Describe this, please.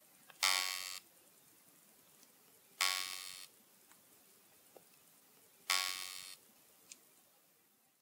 Put a boing in your film. Recorded with iphone 4s.